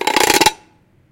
One of a pack of sounds, recorded in an abandoned industrial complex.
Recorded with a Zoom H2.
city, clean, high-quality, percussive, industrial, metallic, metal, field-recording, percussion, urban